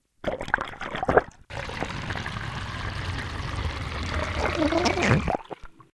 hydrophone-sinktest
I just made a hydrophone (see link in comments), this was the first recording I made from it. In the sink full of water and removed the plug...down to the sea with it tomorrow!
gurgle, drain, test, glug, hydrophone, sink